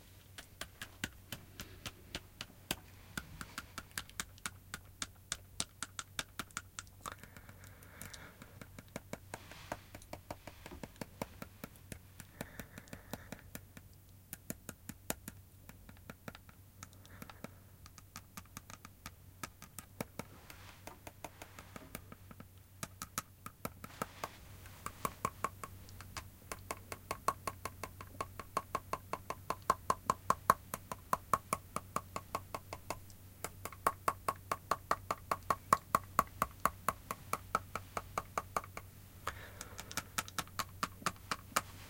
20080103.teeth.chatter.02
noise made with my teeth